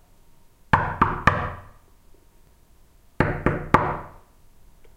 Tür klopfen 01
Door knocking
Recorder: Olympus Ls-5 and Ls-11
t, thrill, klopfen, knocks, background-sound, atmos, knock, atmo, background, knocking, door, rklopfen, terror, atmosphere